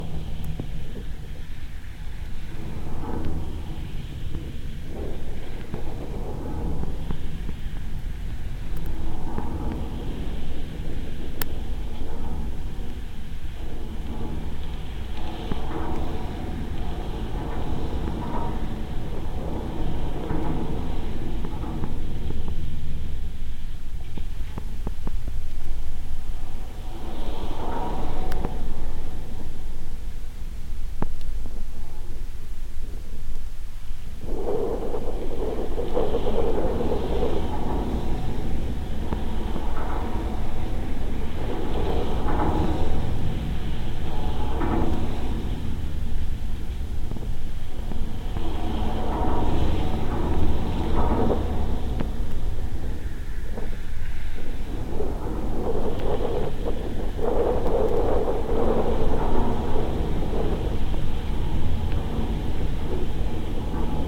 GGB suspender SE03SW
Contact mic recording of the Golden Gate Bridge in San Francisco, CA, USA at southeast suspender cluster #3. Recorded December 18, 2008 using a Sony PCM-D50 recorder with hand-held Fishman V100 piezo pickup and violin bridge.
bridge, cable, contact, V100